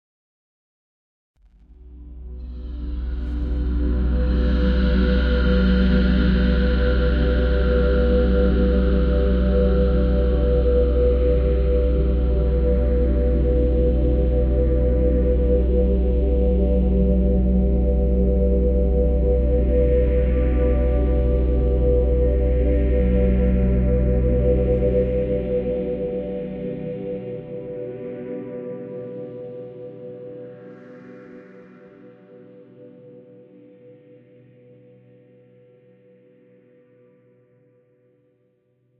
An ambient chord that is long and slowly evolving.
ambient, chord, breathe, tone, synth, sound-design